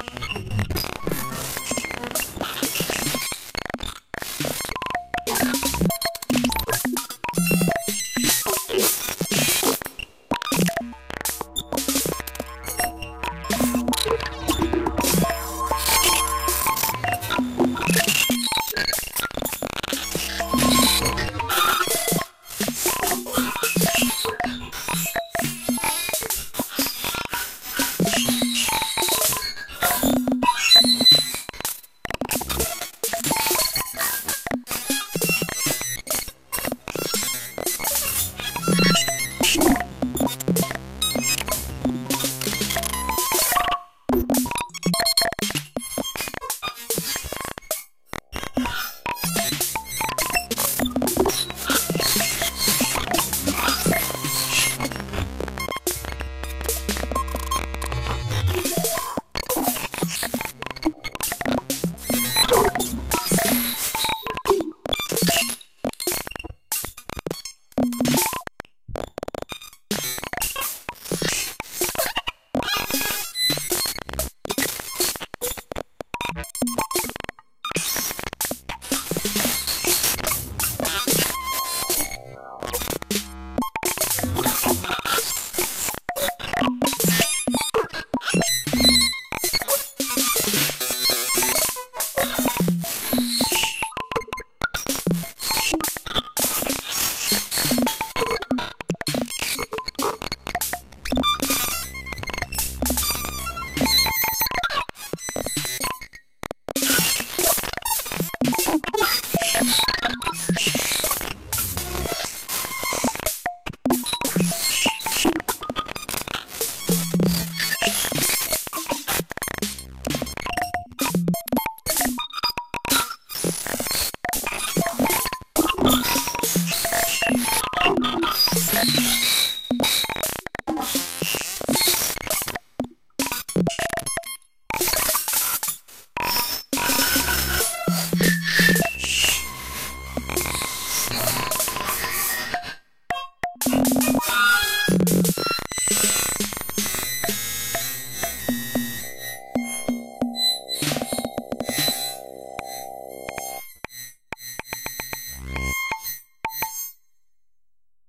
VCV Rack patch